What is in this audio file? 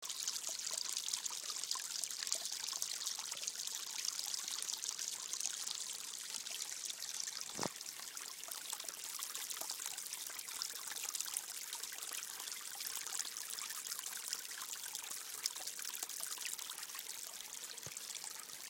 Small water stream/brook in the forrest, summertime